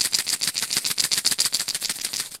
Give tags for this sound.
sonokids; shaker; percussion

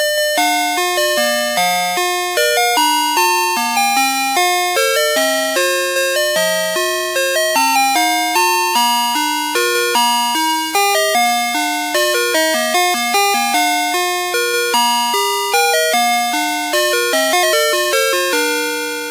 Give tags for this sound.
chime; cream; Ice

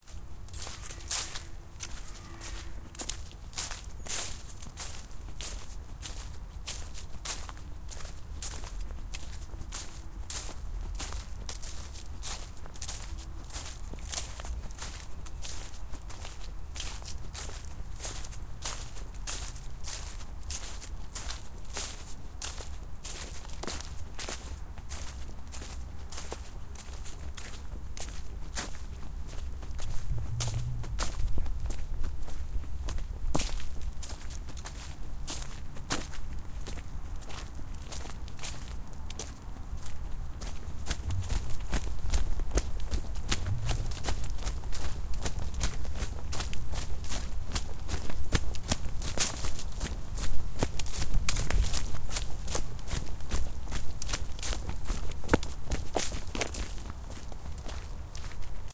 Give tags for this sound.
footsteps; jogging; running; walking

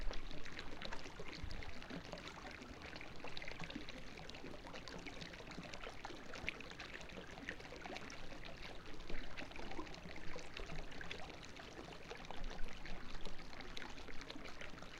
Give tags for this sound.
nature; stream; water

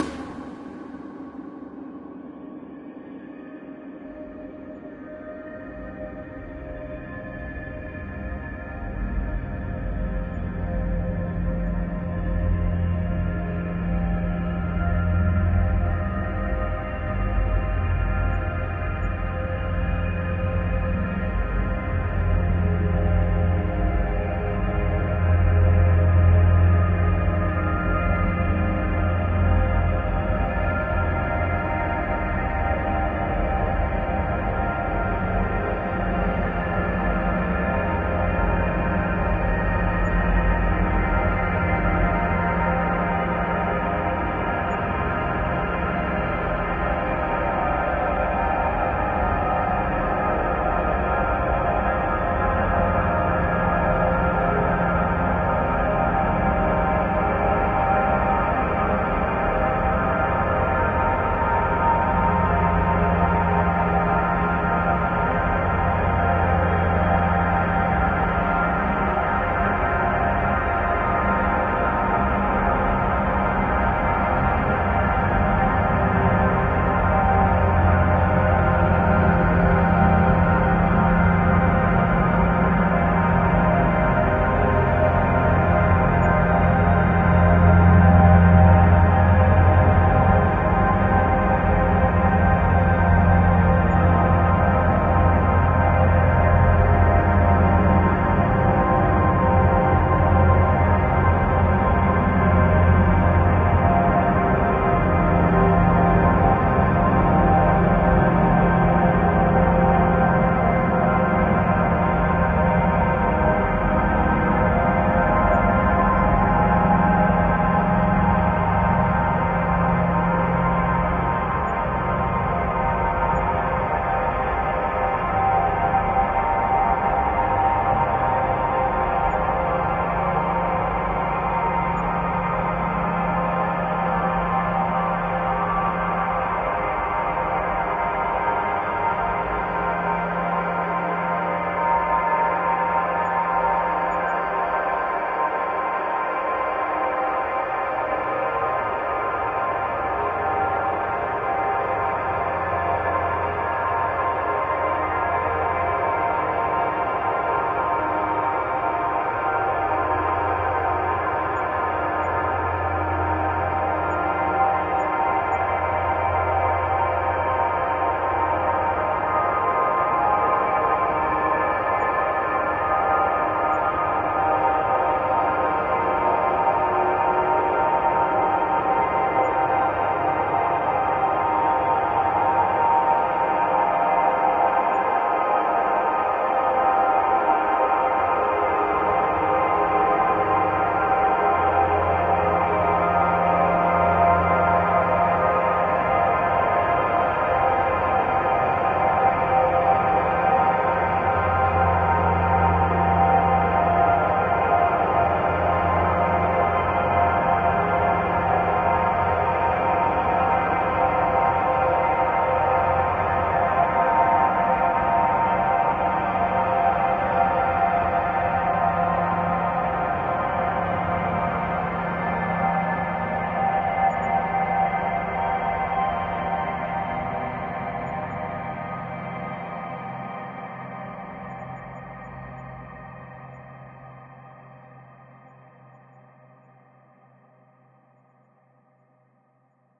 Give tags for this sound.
drone; divine

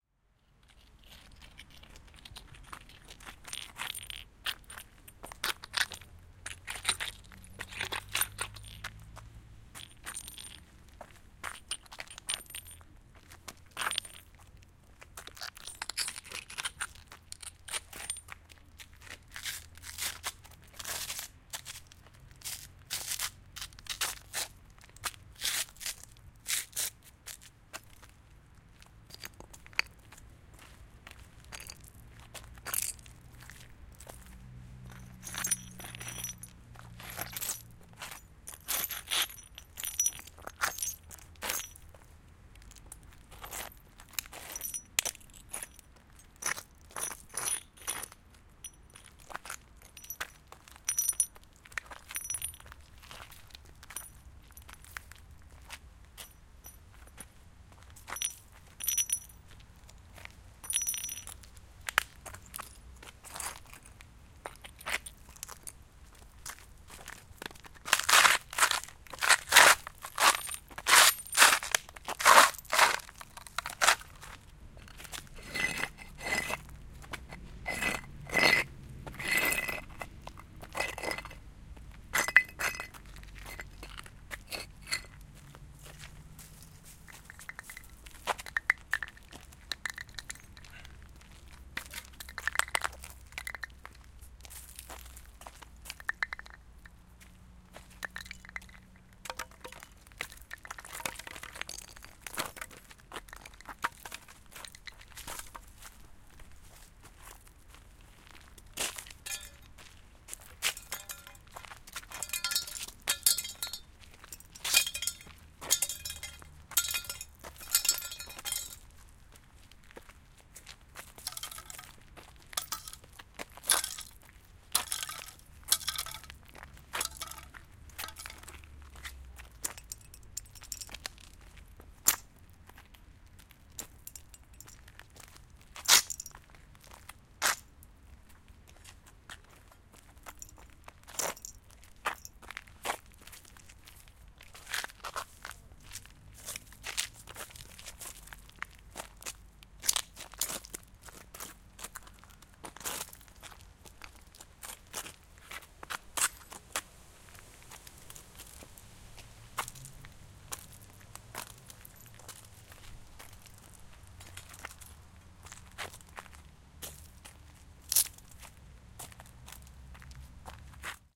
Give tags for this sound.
Poland; Wroclaw; rustle; Szczepin; shuffling; scarping; field-recording

